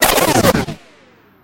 Laser Element Only 2
Laser ripple effect, good for layering with other laser sounds.